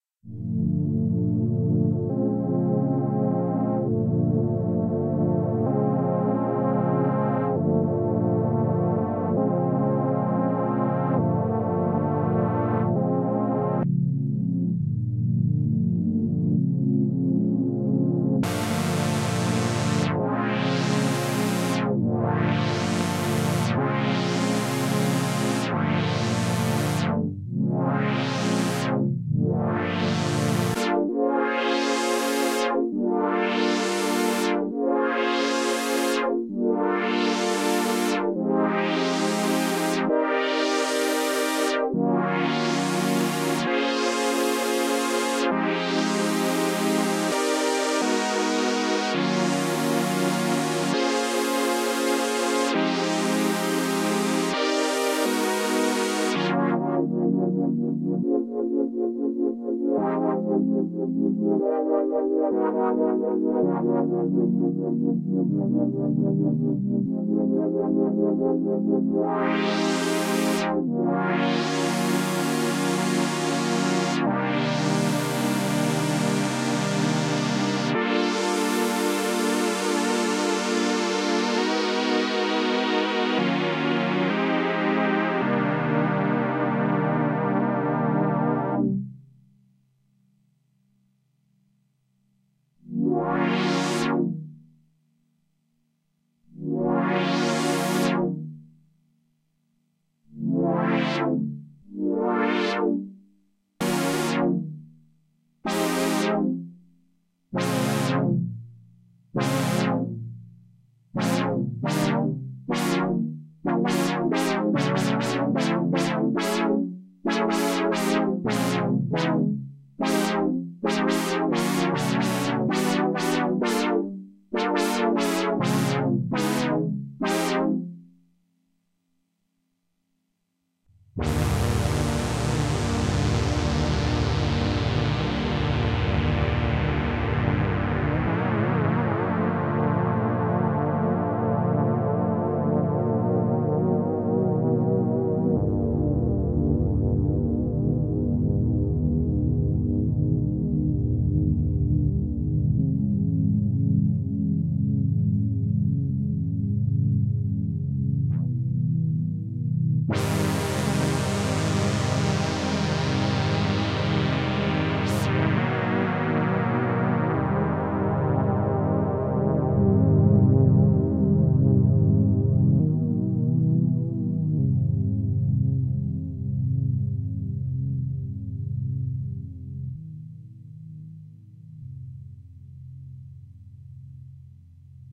Oberheim Filter Chords
Showcasing the filter on some chords played on the unearthly Oberheim OB-8 analogue synthesizer. Would love to hear your re-purposing of it!
analog, chords, filter, OB-8, Oberheim, pad, synth